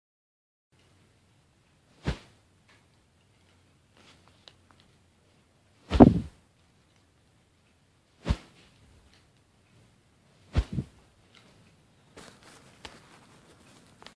A recording of a baseball bat swung quickly and wooshing past.
bludgeoning, swoosh